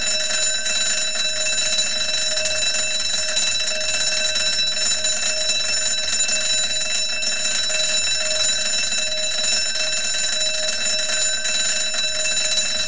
A stereo recording of a fire alarm cut to loop. Rode NT-4 > FEL battery pre-amp > Zoom H2 line in.
bell, ding, fire-alarm, loop, ring, stereo, xy